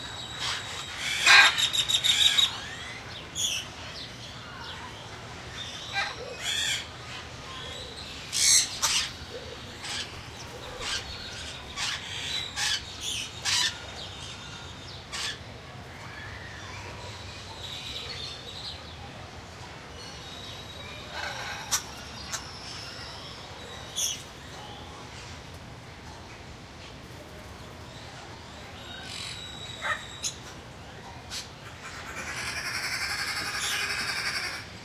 saz birds3
Various birds calling, including Hyacinth Macaw, Sun Conure, Green Wood-hoopoe, Speckled Pigeon and various lorikeets and grackles.